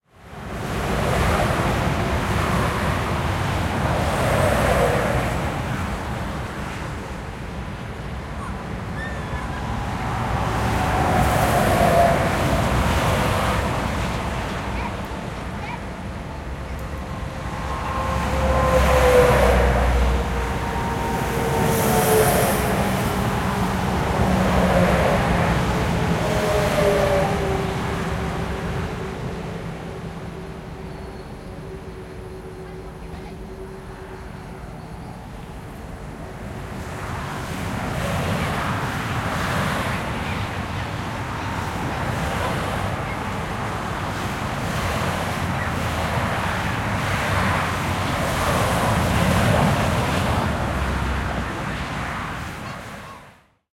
Sound of highway, with cars and trucks passing. For your information, it is on the rest area of Lançon de Provence, on highway A7 in South of France. Sound recorded with a ZOOM H4N Pro and a Rycote Mini Wind Screen.
Son d'autoroute, avec des camions et des voitures. Pour votre information, il s'agit de la circulation sur l'autoroute A7, enregistré depuis l'aire de Lançon de Provence, dans le sud de la France. Son enregistré avec un ZOOM H4N Pro et une bonnette Rycote Mini Wind Screen.